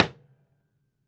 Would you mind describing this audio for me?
a mid-range piano lid closing